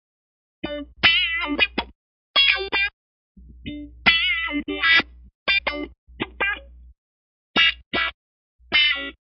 a little guitar riff in the Key of E at 104 bpm with some envelope follower on it